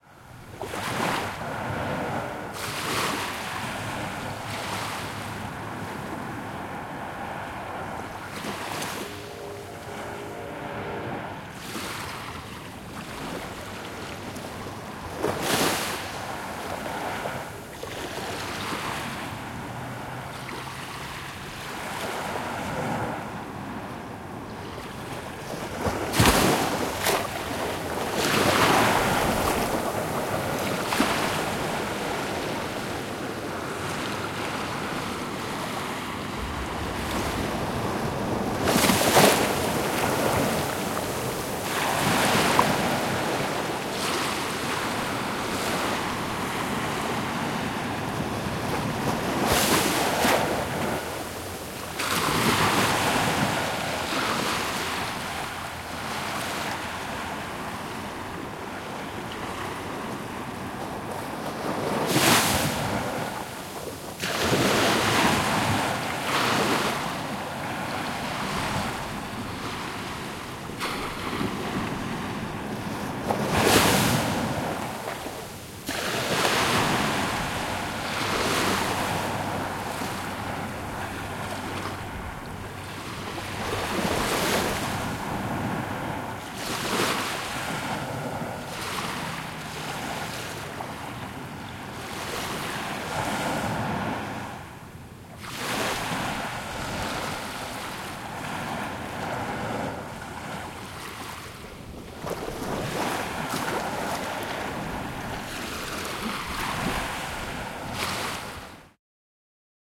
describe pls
This is the sound of waves crashing to the shore. Recorded in stereo with a Sony PCM-D100.